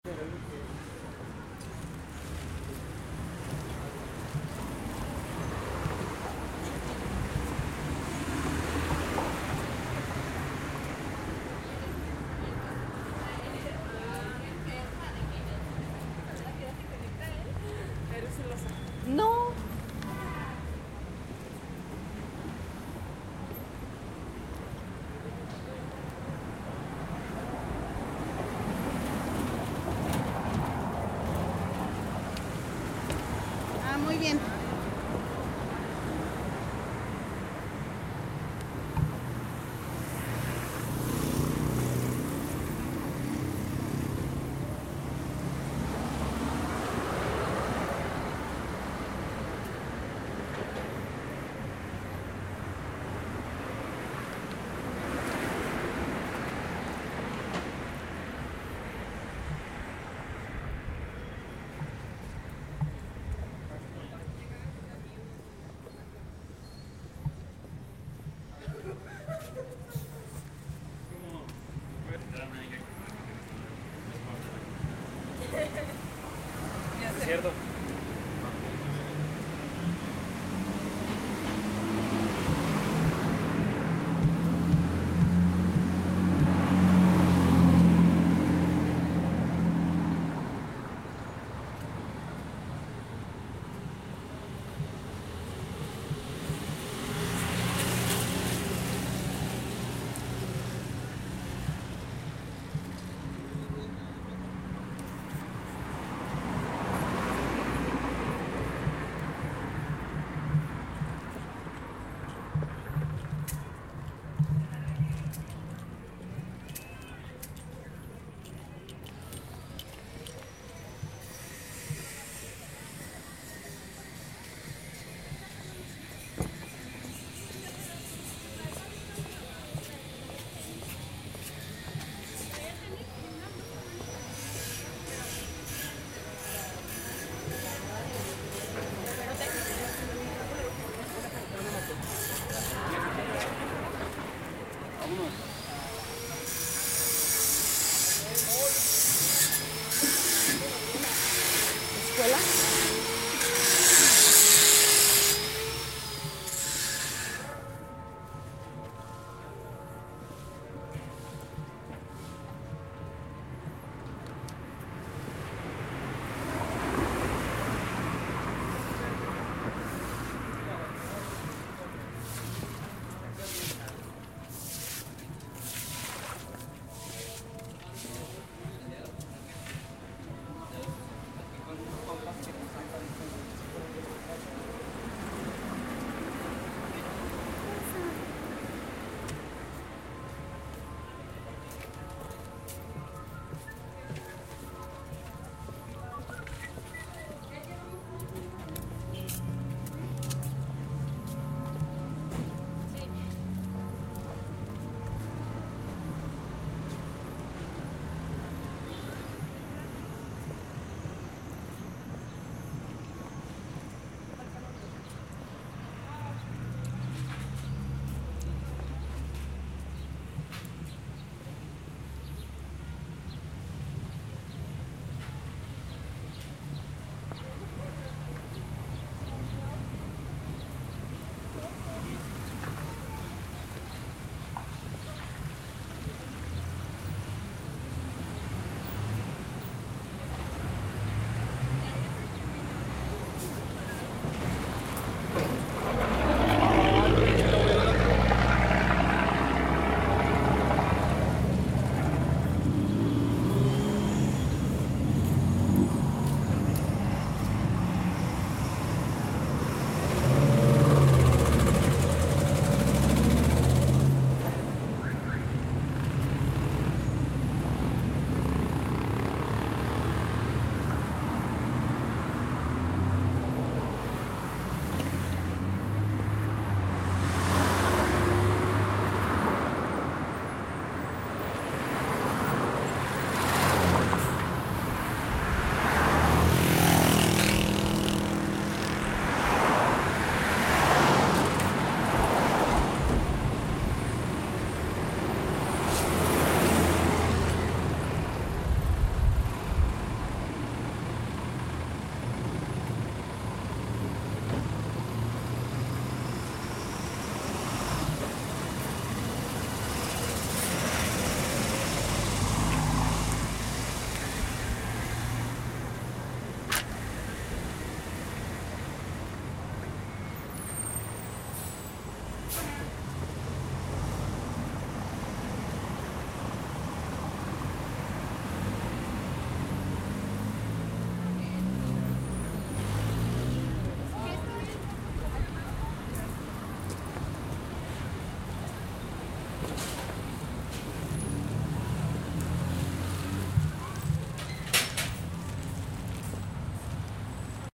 caminando por la calle
caminando por calle poco transitada. Walking for a small street.